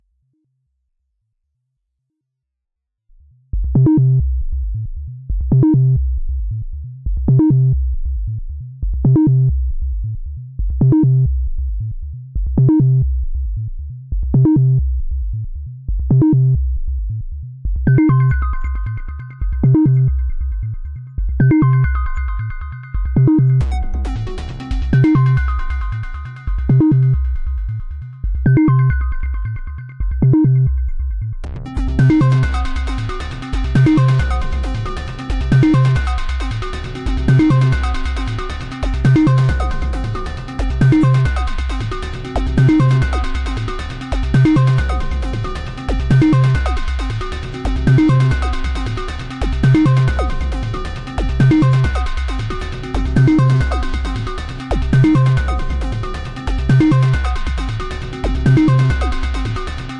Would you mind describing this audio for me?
136 reaktor-craziness
136 bpm slow evolving super echoey bass rhythm. tweaky.
rhythmic, synth